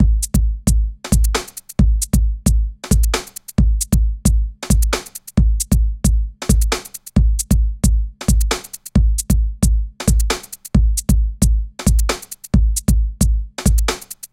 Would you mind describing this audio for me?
futuregarage-loop2
Here's a Future Garage drum break, snares on the 4th downbeat. 134.